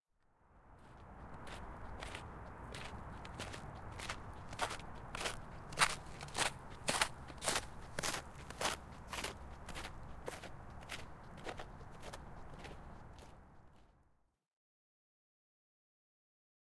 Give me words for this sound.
21 hn footstepsSnowHHconcrete
High Heeled shoe footsteps on light snow over concrete walkway.
concrete, footsteps, high-heeled-shoe, snow